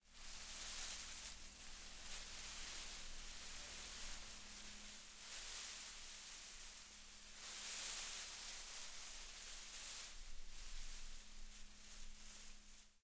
Rustling Field of Dried Grass
Rustling Gentle Dried up Grasslands blowing gently, leaving an odd sound.
nature, ambiance, Grass, field-recording, Dry, Leaves, Windy, Grasslands, Trees